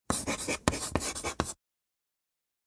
writing-chalk-med-02
01.24.17: Cut up samples of writing with chalk on a blackboard.
action, blackboard, board, chalk, chalkboard, class, classroom, draw, drawing, field-recording, motion, school, students, teacher, text, write, writing